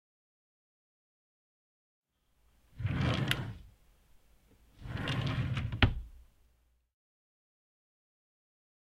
Opening and closing a drawer